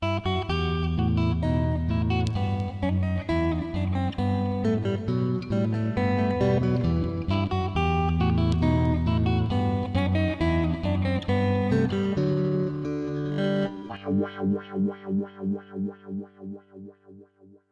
JSBach.bouree.clip
part of a well-known bouree by JS Bach played (poorly) by me with an electric guitar.
bouree, music, bach, guitar